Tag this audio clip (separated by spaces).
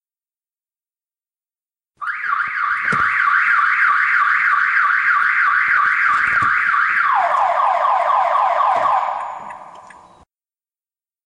alarm alert mojo